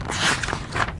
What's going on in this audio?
Book Turn Page 3
Variation of turning a page of a book
turning, book, page, turn